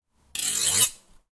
Sound of knife slash. Sound recorded with a ZOOM H4N Pro.
Bruit de couteau. Son enregistré avec un ZOOM H4N Pro.